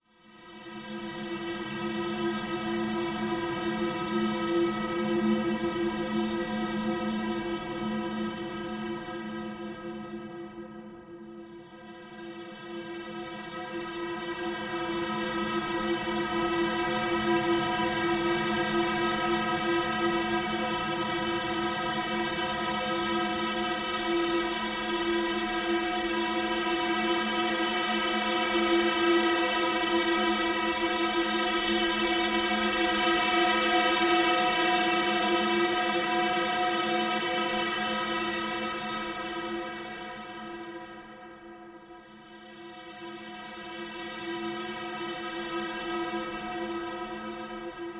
Wind sound mixed with Reaper effects.